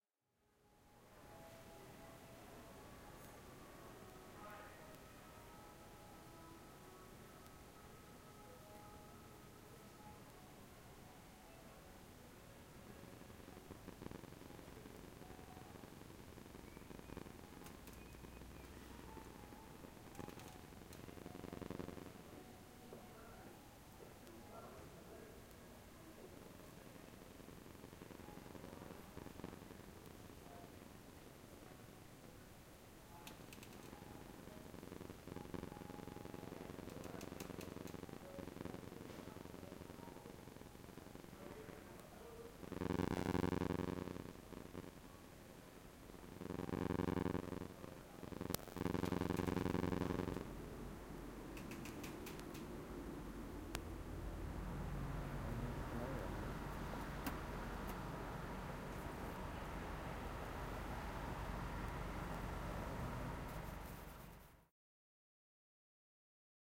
45 hn MacysBinaural2
Binaural recording of exiting onto street Macy's in downtown Pittsburgh. Noise of FR sensor interfering with microphones when entering store. Home-made binaural microphone.
binaural, department-store, pittsburgh